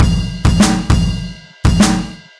100 Studio C Drums 02
bit, crushed, drums, dirty, synth